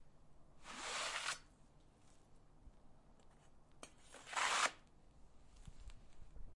Sword drawn and holstered again
sword being draw and put back
fighting, knight, medieval, owi, sword